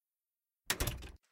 An office door closing.
close, closing, door, office